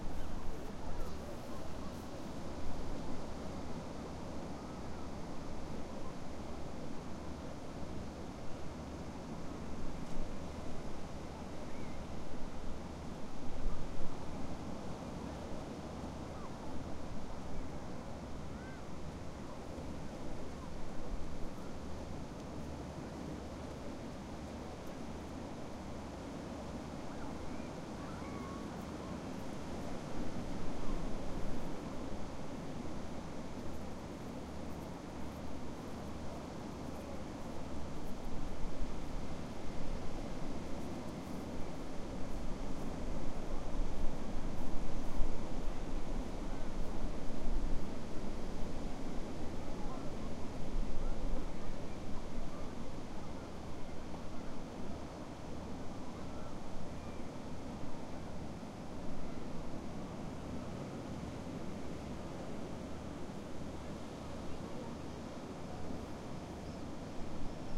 Beach, birds, distant neutral conversations, Dieppe, France 1
Beach, birds, distant neutral conversations, Dieppe, France.
beach, field-recording, localization-ambience